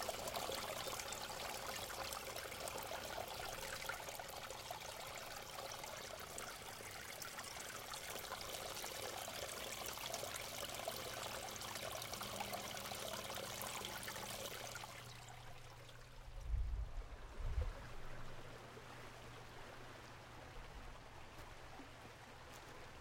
WS CUWater
Recorded about 1 ft away from the surface of the water.
nature, running, sound, stream, water, wild